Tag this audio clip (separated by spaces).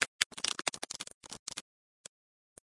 crack frost crackle ice